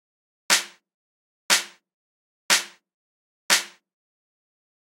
O Clicky Snare
I took a snare hit from a song I recorded on and gated it to be clicky. Enjoy
clicky-snare, pop-snare, snare-drum